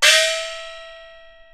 Recording of a single stroke played on the instrument Xiaoluo, a type of gong used in Beijing Opera percussion ensembles. Played by Ying Wan of the London Jing Kun Opera Association. Recorded by Mi Tian at the Centre for Digital Music, Queen Mary University of London, UK in September 2013 using an AKG C414 microphone under studio conditions. This example is a part of the "Xiaoluo" class of the training dataset used in [1].
beijing-opera, china, chinese, chinese-traditional, compmusic, gong, icassp2014-dataset, idiophone, peking-opera, percussion, qmul, xiaoluo-instrument